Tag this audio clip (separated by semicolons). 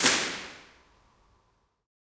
impulse-response; reverb